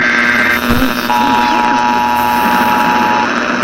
A sound I put together to creep out a coworker using an old phone, an mp3 player and soldering.